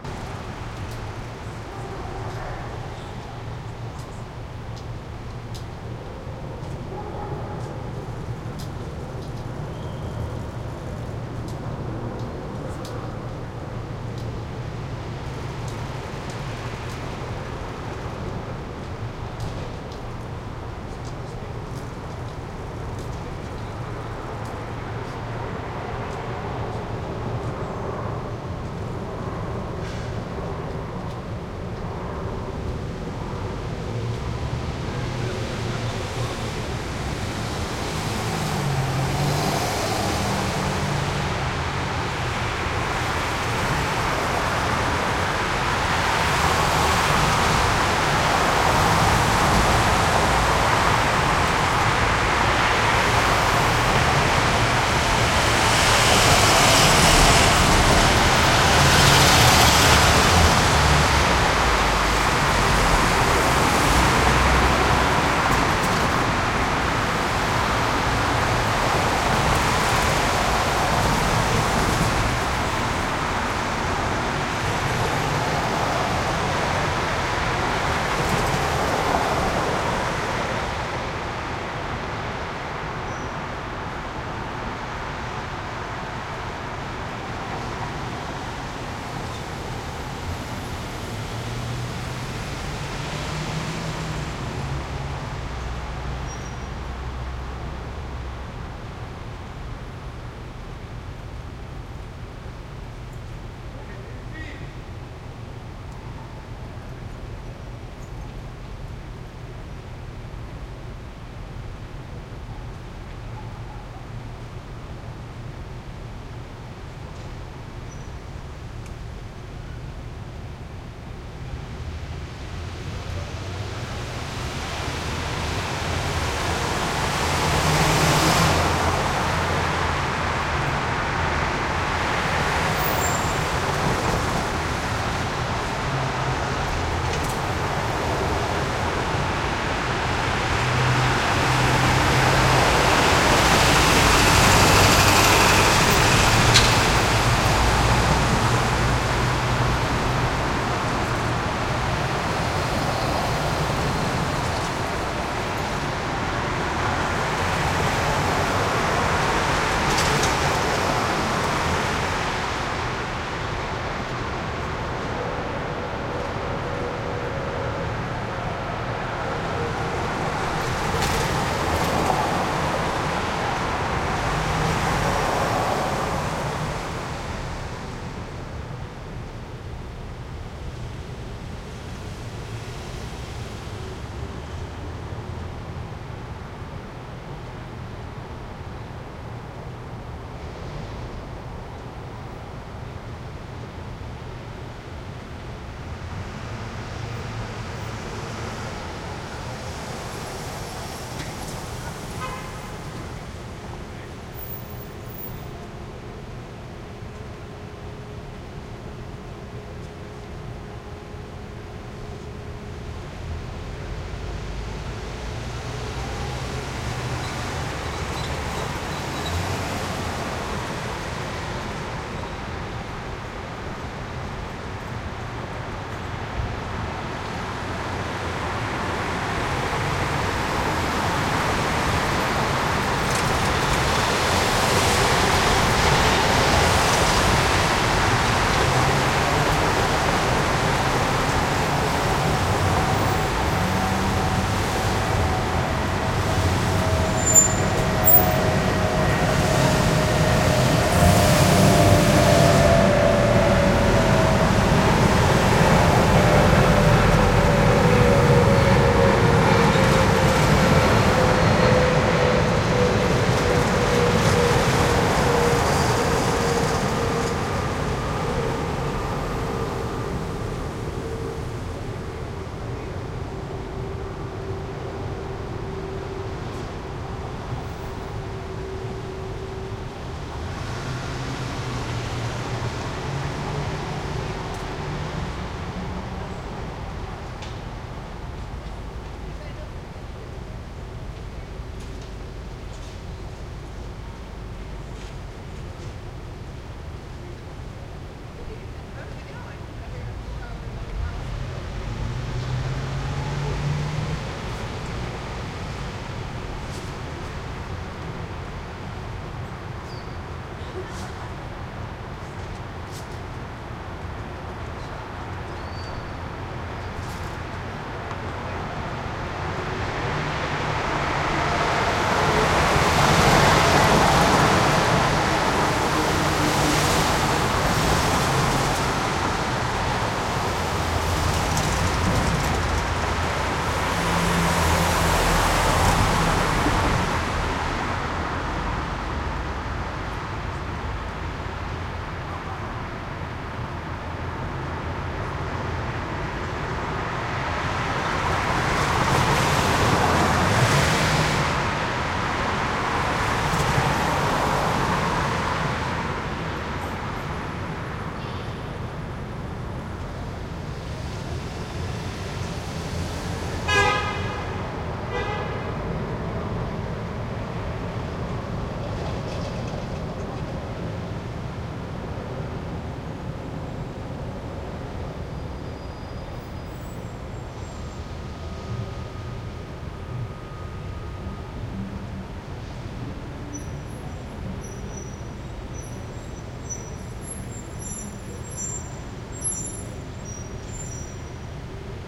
traffic light from 3rd floor window or balcony NYC, USA
or, window, light, 3rd, from, NYC, balcony, traffic, floor, USA